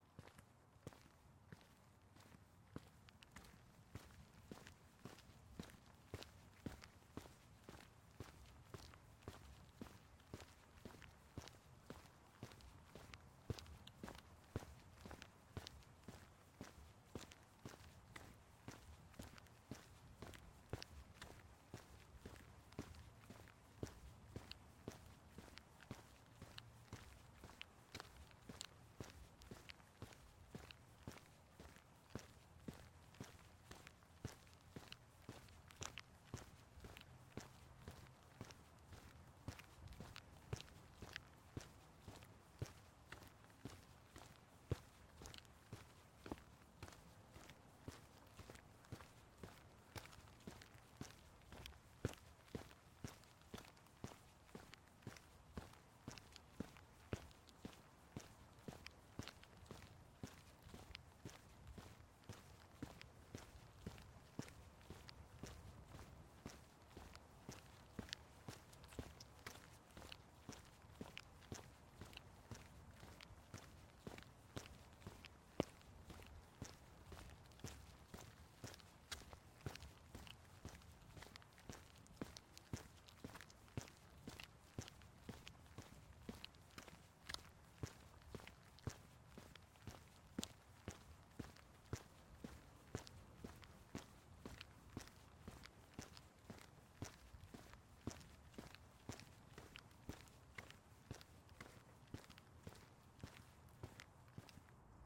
FOLEY Footsteps Sidewalk 002
Rubber soled boots walking on a sidewalk, close perspective
Recorded with: Sanken CS-1e, Fostex FR2Le
boots, concrete, foley, footsteps, gritty, walk, walking